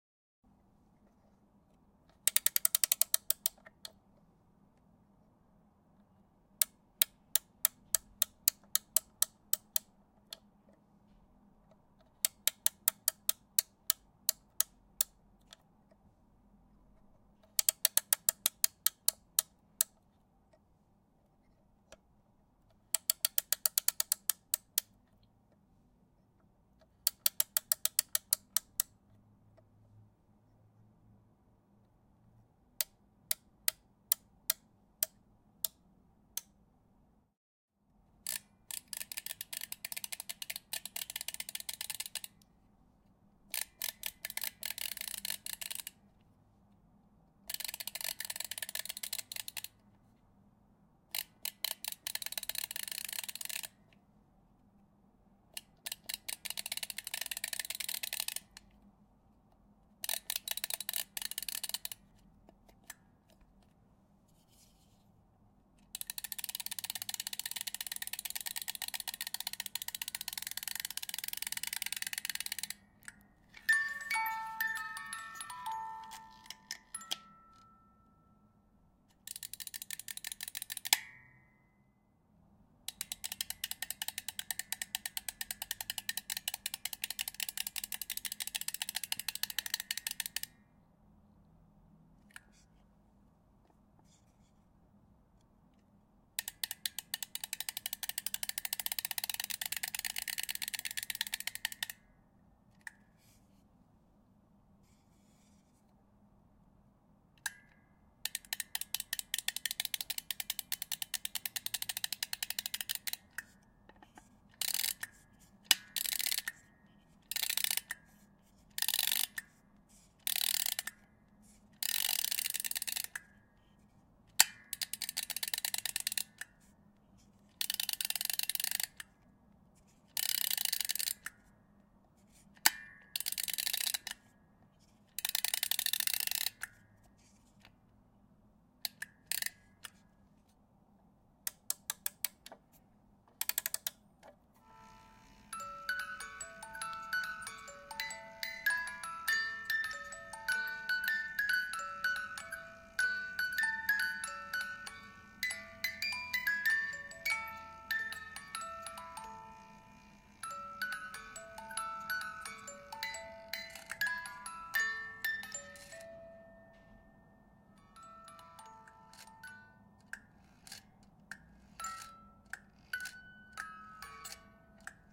Close rewind of metronome and a music box
Willner metronome and a noname music box winding with different speeds.
Stopping the music box mid play.
Gear: Rode NT-2A - Roland Quad-capture. The sound is raw and has not been modified.
Saba Kapanadze.
Antique, Mechanical, box, hand-operated, mechanical-instrument, mechanism, music-box, musical-box, musicbox, toy, wind-up